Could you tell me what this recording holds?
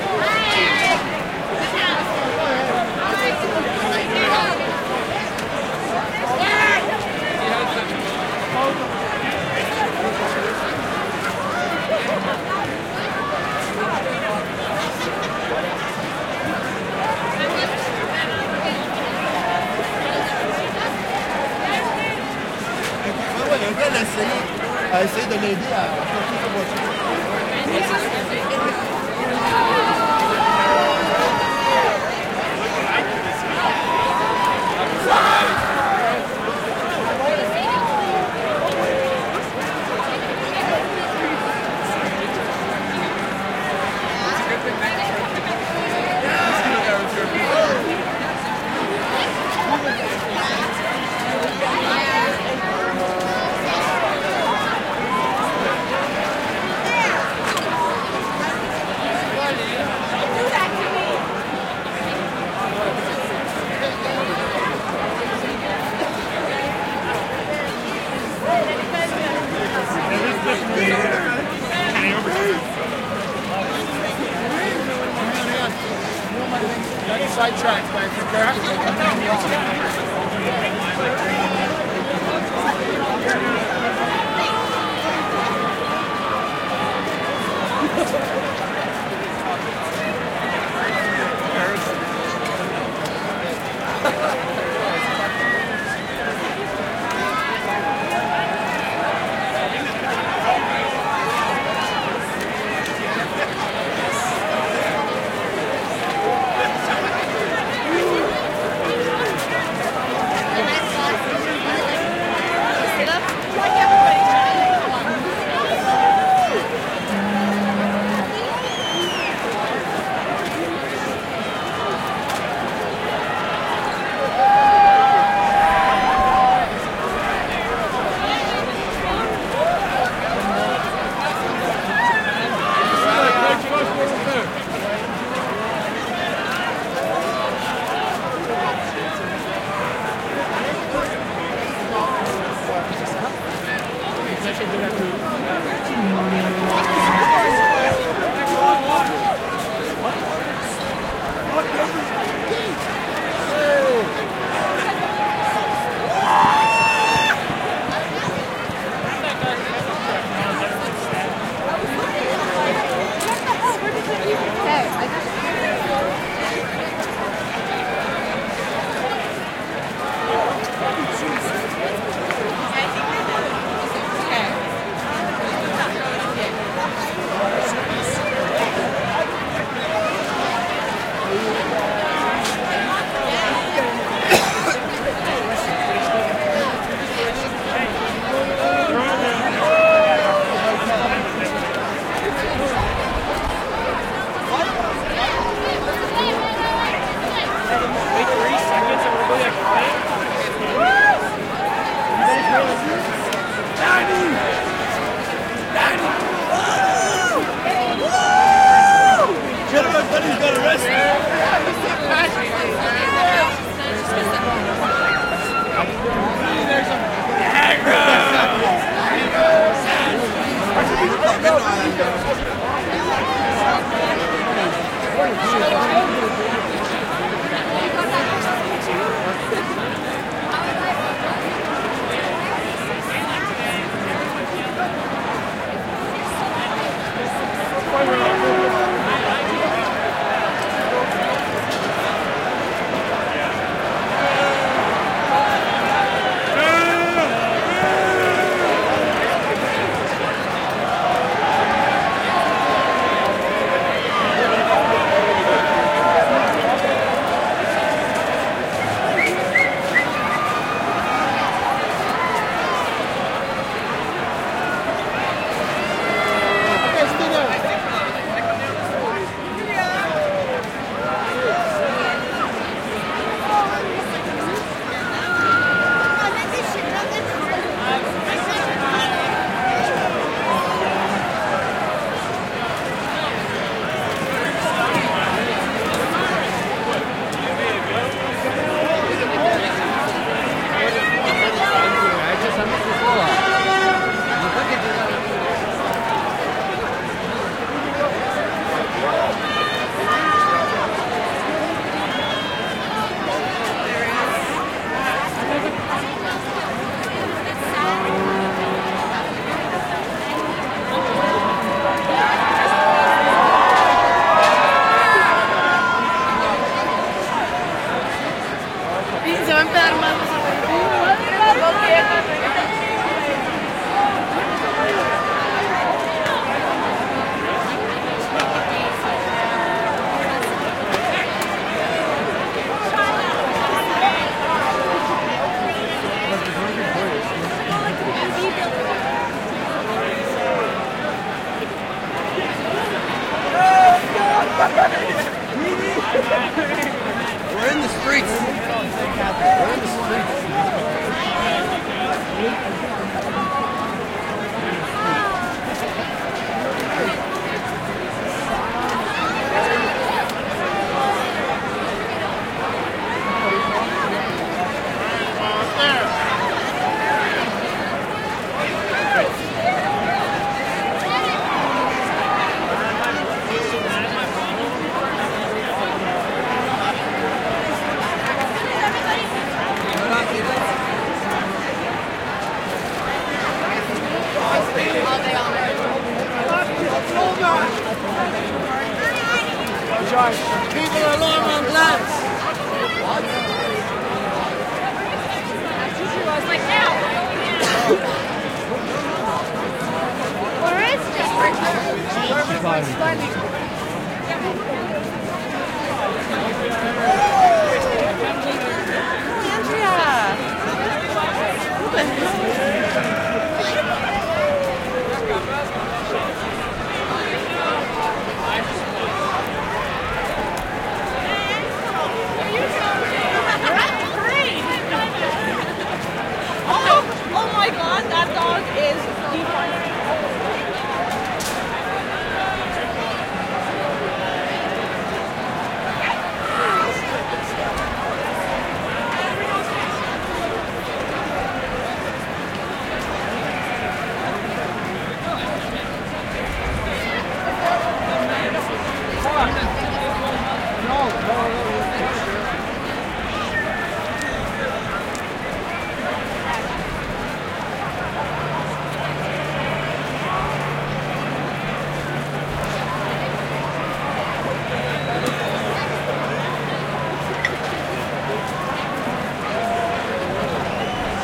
crowd ext large after parade1 moving with yelling shouting fun also good for protest Montreal, Canada
yelling, protest, fun, good, ext, Montreal, Canada, large, parade, after, crowd, shouting, also, moving